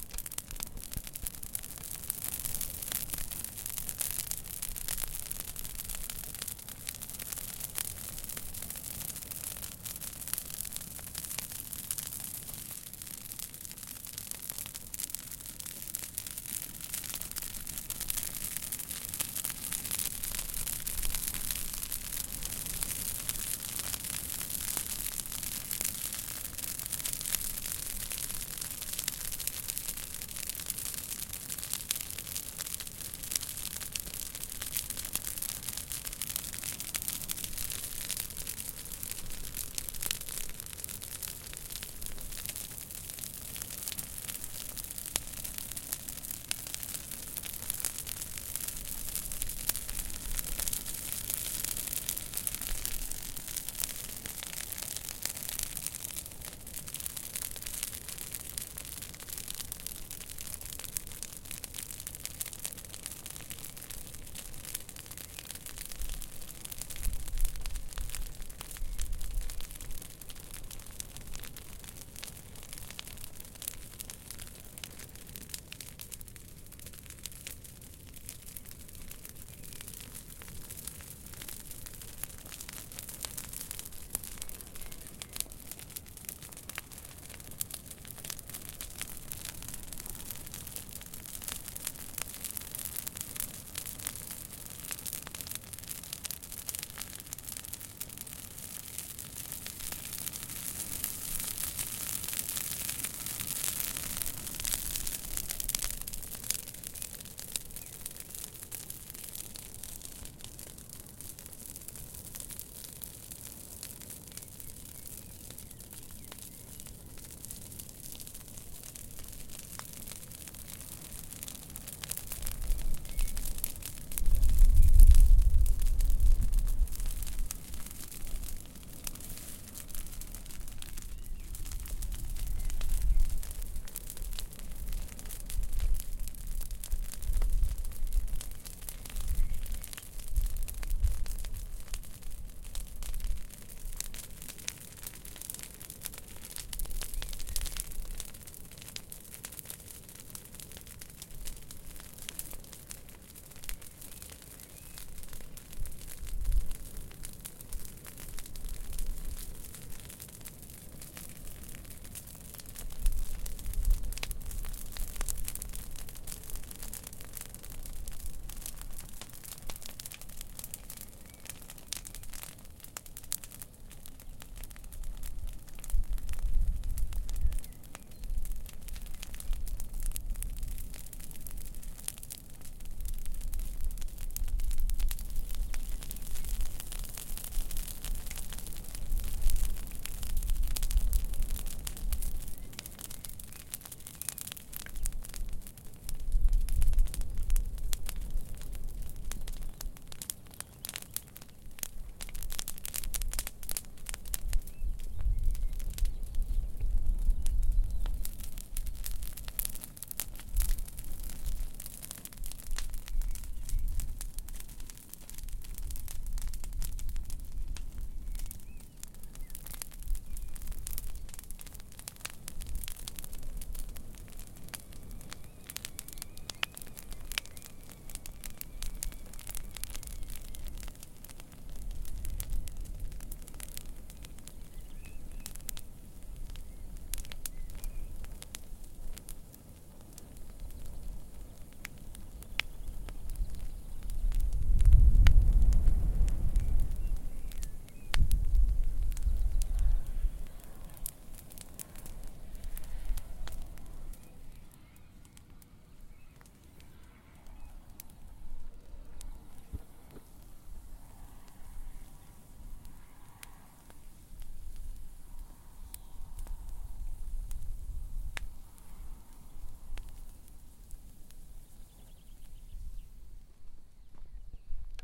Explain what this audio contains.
fire outside woods sticks
recorded with Zoom H4, outside of my village...dry sticks and woods...
woods, fire, sticks, burning